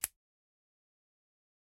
This is a recording of a automatic ice pick opening. Recorded with a Core Sound Tetramic coupled to a Zoom F8 and rendered using Reaper DAW. Enjoy!
Ambisonic, Automatic, Core, Effect, F8, Field, Ice, Pick, Recorder, Rycote, Sound, Stereo, Tetramic, Zoom